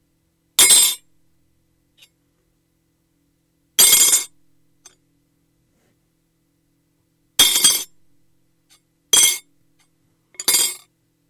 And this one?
spoon drop2
A teaspoon drops onto a plate. Several takes.
plate, foley, spoon, hit